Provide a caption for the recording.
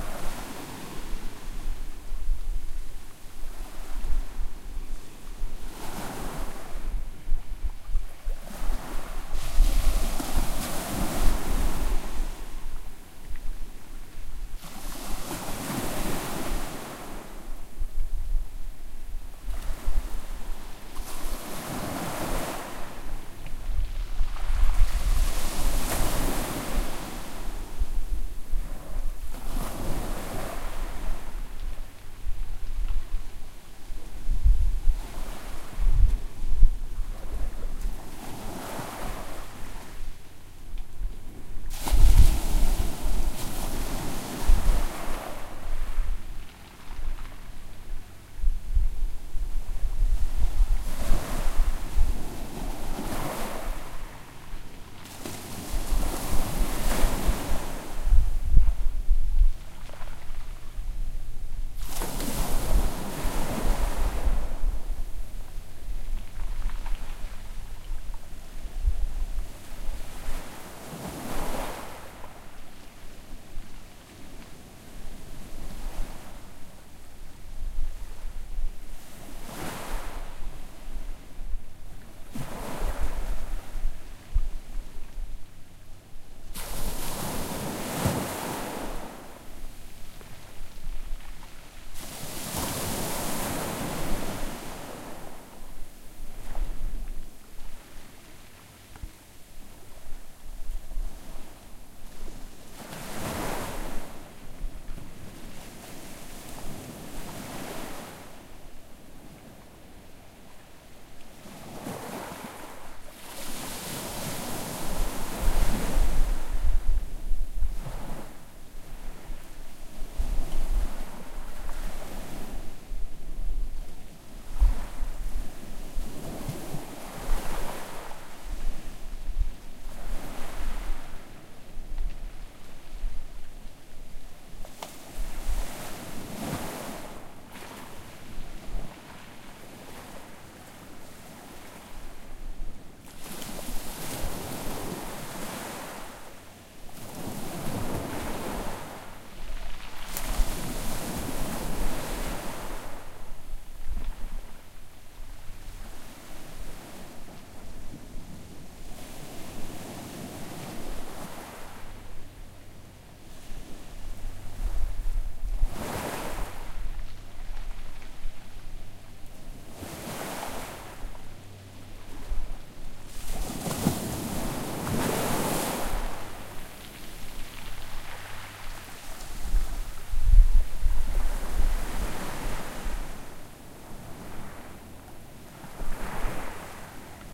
Tropical beach waves on pebbled shore 2
Waves washing up on pebbled shore in St. Croix, second recording, with some more articulation of the pebbles rolling in the backwash.